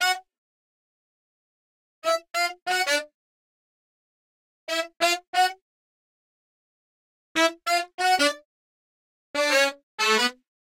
14 saxes dL
Modern Roots Reggae 14 090 Bmin A Samples
Reggae, Roots